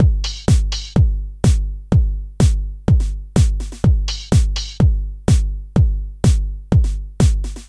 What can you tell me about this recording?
Sicily House Intro
Sicily House Beats is my new loop pack Featuring House-Like beats and bass. A nice Four on the Floor dance party style. Thanks! ENJOY!
chilled-house, beat, four-on-the-floor, dance, 125bpm, italy, bassy, house